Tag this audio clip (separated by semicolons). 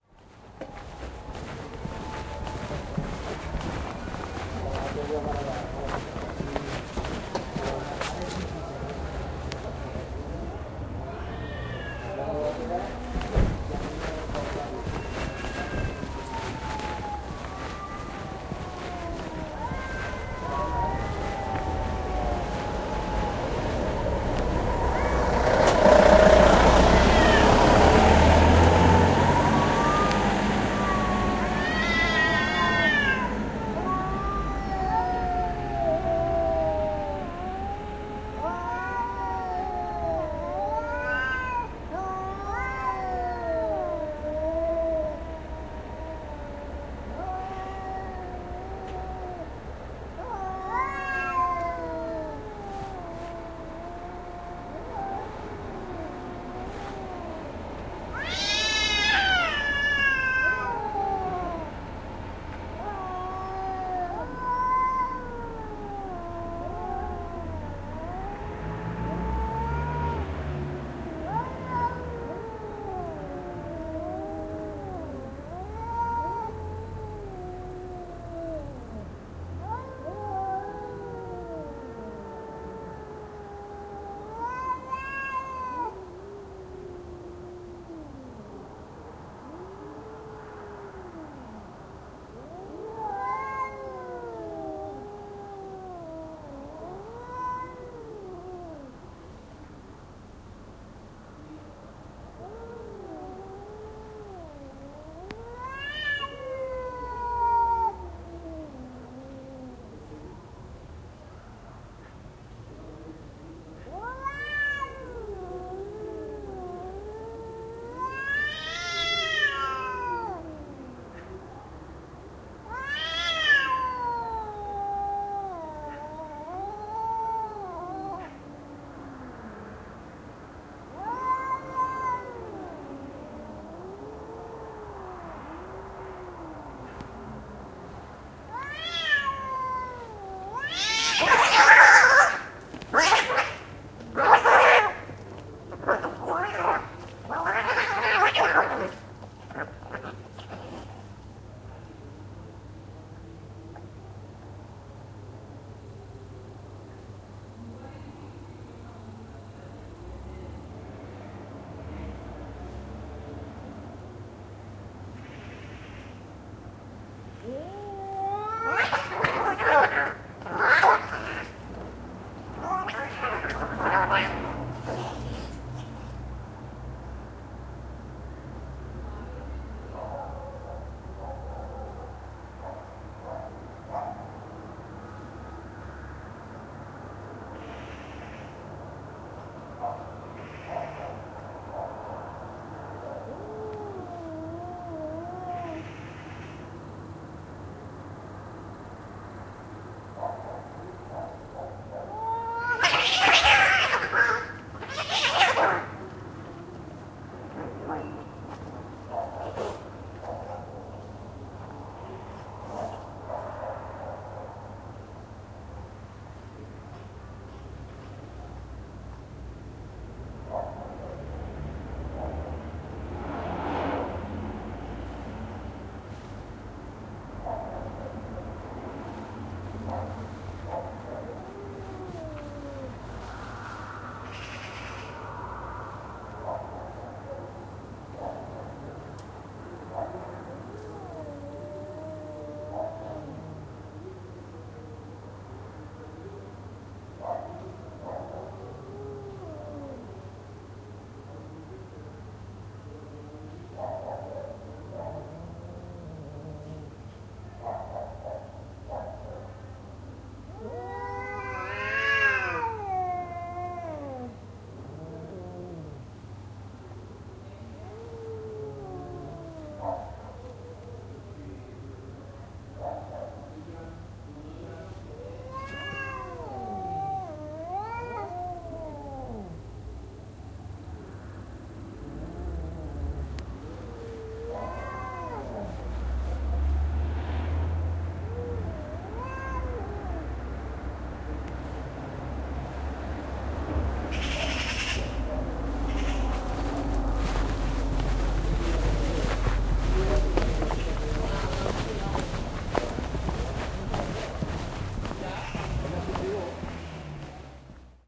cat; field-recording; fight; meow; street